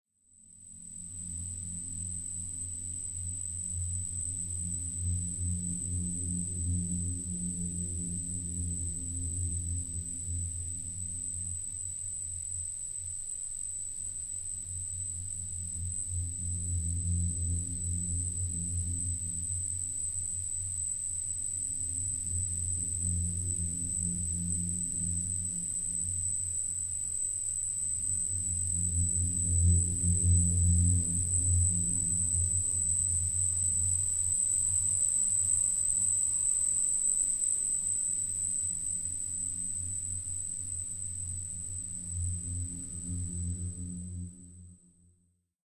science-fiction fantasy film designed